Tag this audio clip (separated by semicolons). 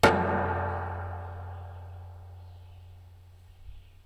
field-recording; hit